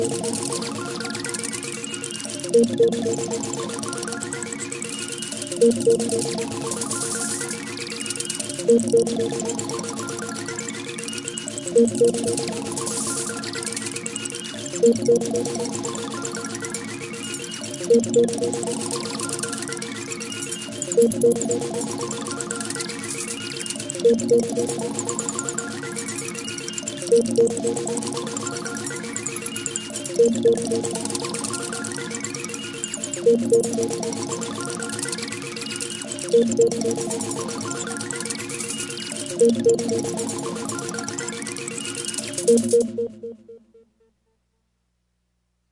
Pattern I made using Korg Electribe and sample of bacon sizzling, recorded to audacity
Sci-Fi, bacon, space, strange, loop, synth, weird